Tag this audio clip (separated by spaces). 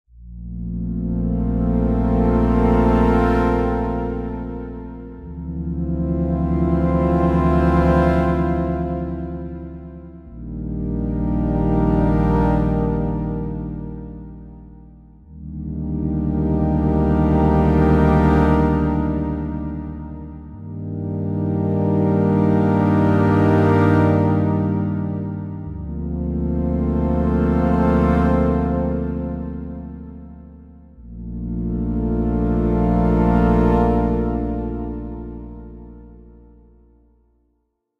f-sharp swell chords sweet strong synthetic